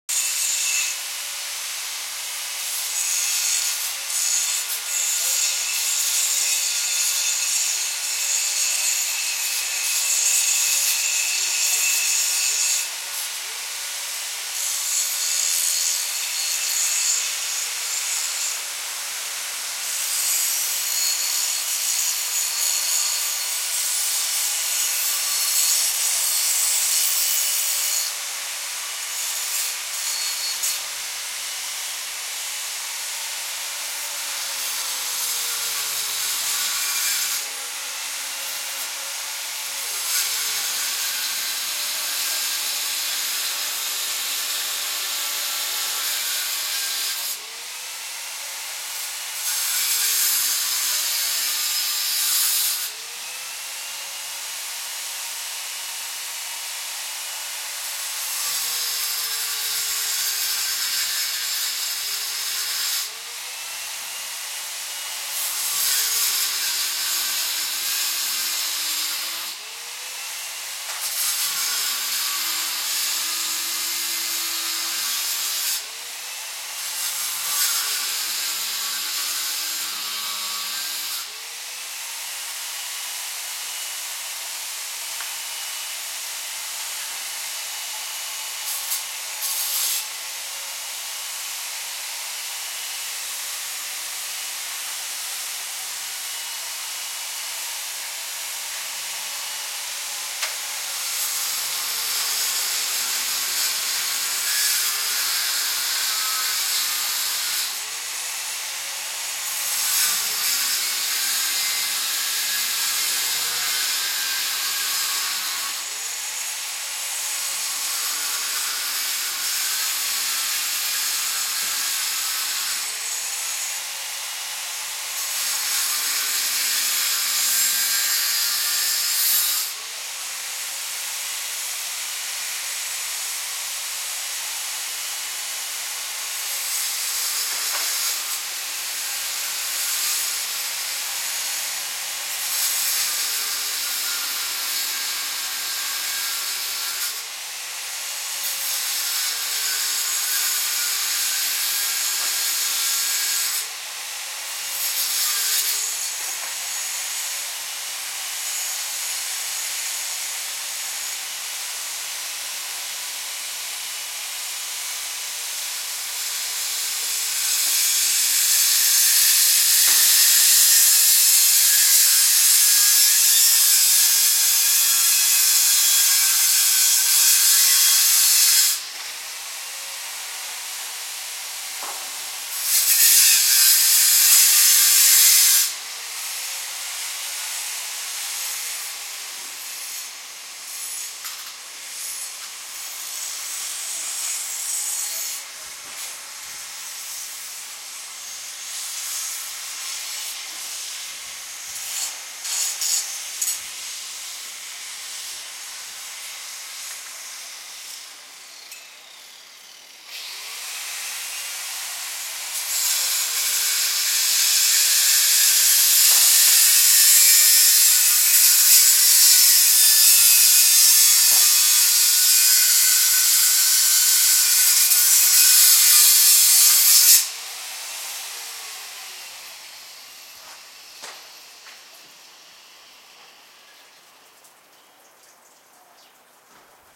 disc grinder buzz alley Montreal, Canada
buzz, alley, grinder, disc